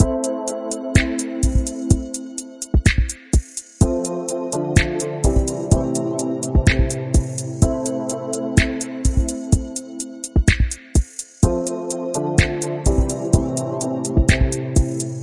short new school r&b loop
130-bpm, beat, drum-loop, sad